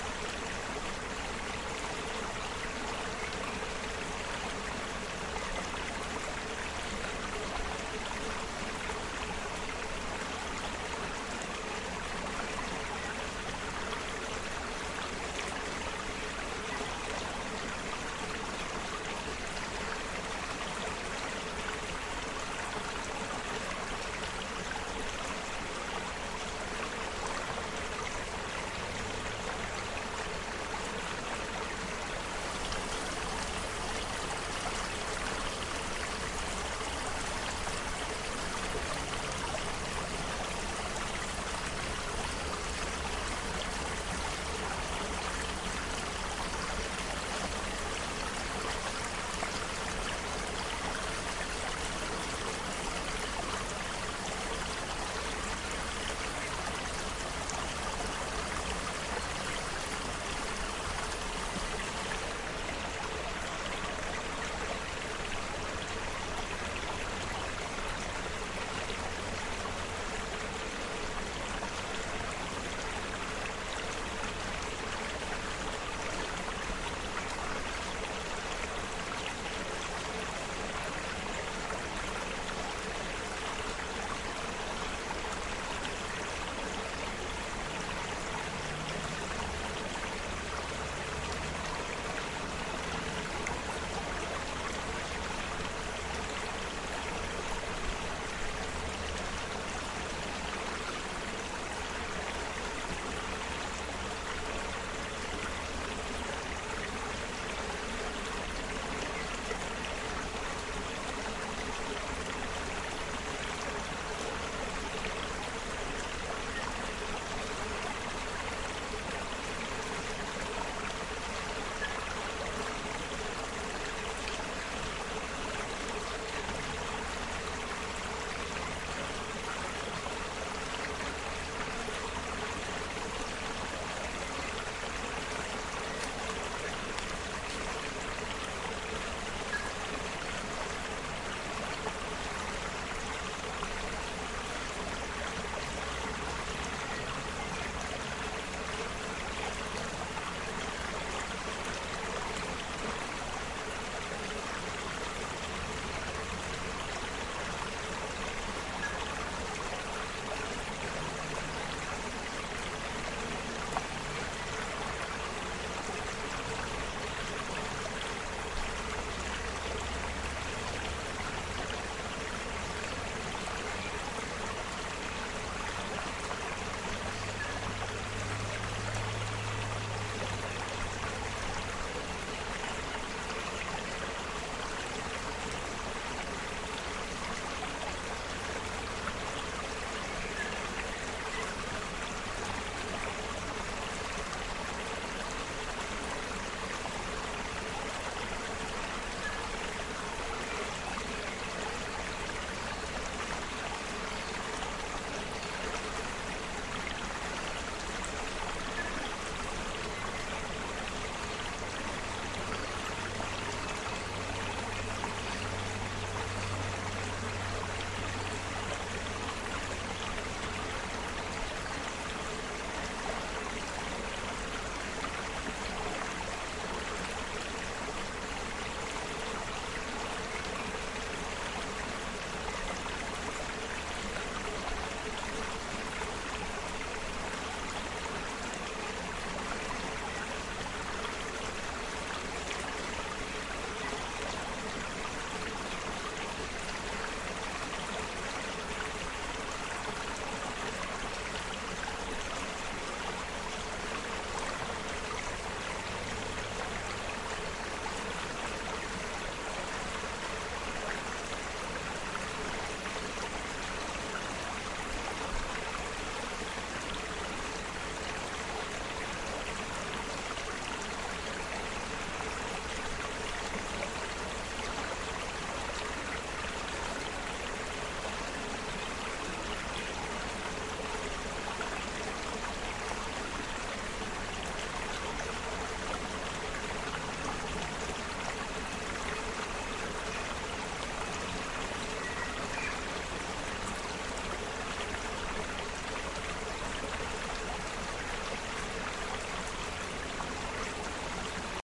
small stream sound track
ambient recording of a small stream in Auckland New Zealand part 1 - this is within earshot of a road though mostly usable
brook, creek, river, small, stream, water